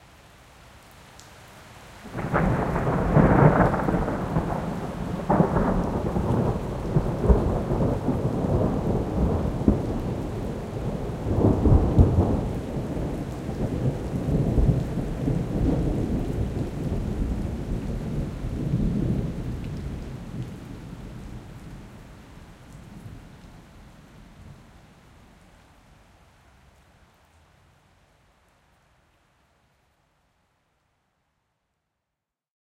Thunder September 2009 2
Nothing special, just my usual habit of trying to grab a piece of audio from every thunderstorm that comes my way. Interesting how each storm sounds different. The sounds of parrots and other birds can be heard as the thunder storm rolls in. Recording chain: Rode NT4 stereo mic in Rode Blimp - Edirol R44 (digital recorder).
nature, field-recording, thunder, bang, atmosphere, weather, rain, boom, storm